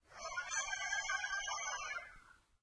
Rooster, Crowing, A
Audio of a neighbor's rooster crowing. The noise has been removed using Audacity.
An example of how you might credit is by putting this in the description/credits:
The sound was recorded using an "NTG2 Shotgun Microphone" connected to a "Zoom H1 recorder" on 18th February 2017.